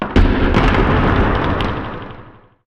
building collapse05 loud
made by recording emptying a box of usb cables and various computer spares/screws onto the floor then slowing down.. added bit of reverb
building, rubble, collapse